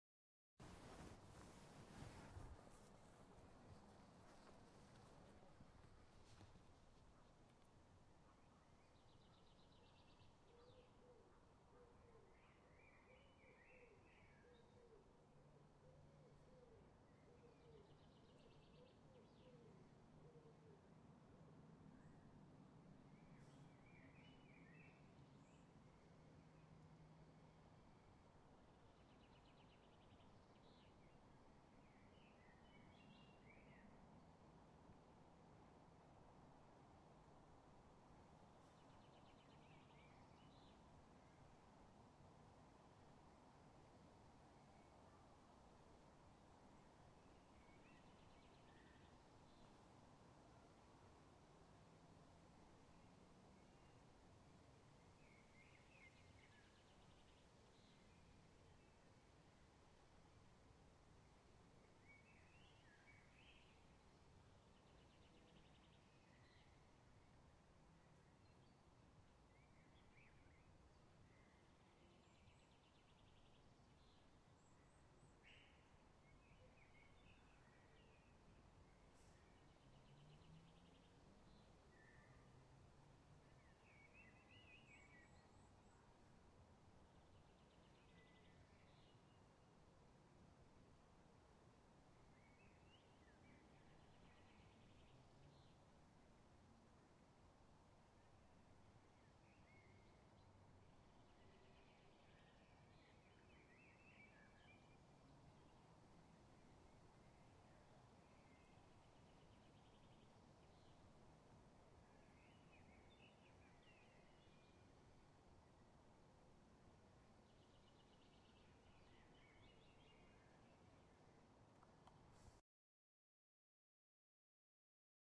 Pleasure ground in centre of Prague: birds, dogs, trees...